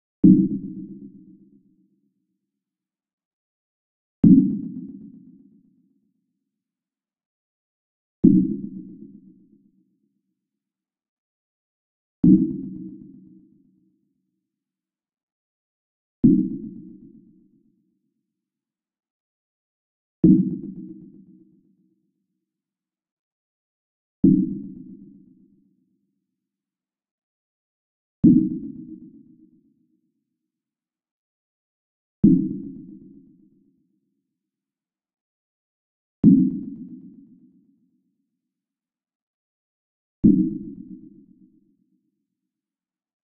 Beat loop Fx
Beat loop,silenth1 sound.
synth, beat, loop, original, ambient, sound, noise, electronic, fx